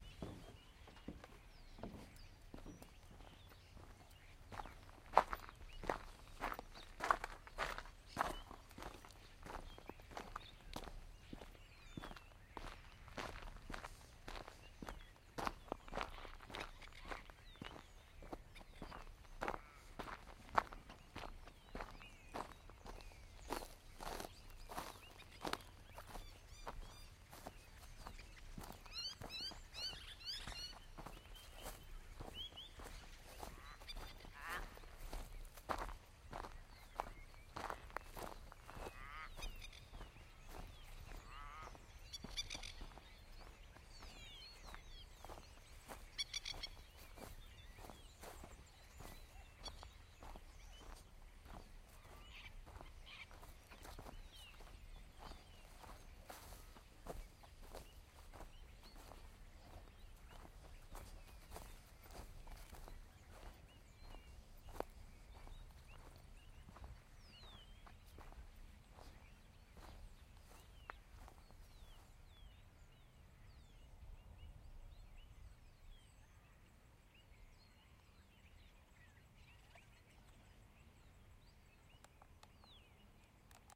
On the way you can hear (in no particular order) the following birds, Lapwing Plover, Noisy Miner, Raven, Galah, Blackbird. Recording chain: Rode NT4 (stereo mic) - Sound Devices Mix Pre (mic preamp) - Edirol R09 (digital recorder). EDIT: Ooops, Vinyard Walk = Vineyard Walk, too quick with the upload finger :)